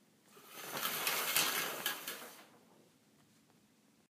Opening a shower curtain.